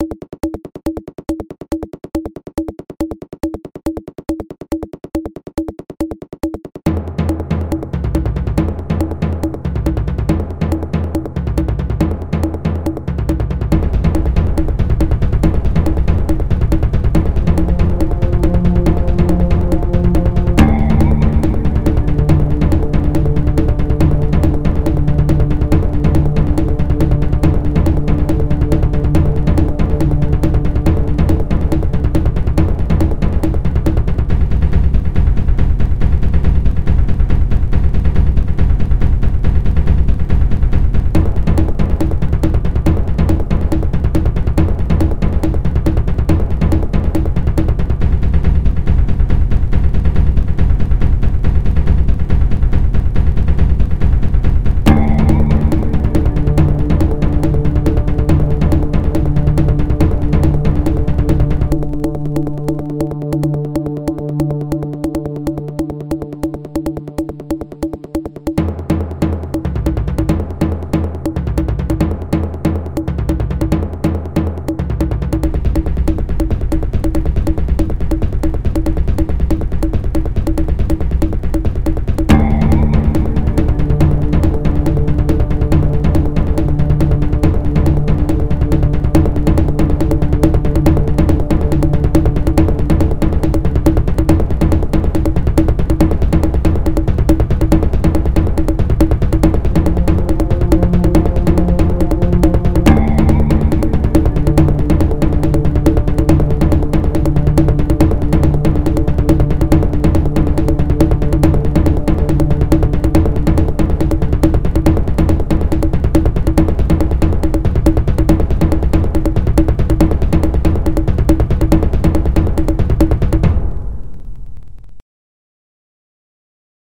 Wild Hunter
Music made 100% on LMMS Studio. Instruments: Tons, bassdrum acoustic, bongos, stringpad, bass, and nord ambient. (Names in LMMS)
ACTION-DRUMS, ACTION, CHASE, DRUMS, WILD, INTENSE, SURVIVAL, HUNT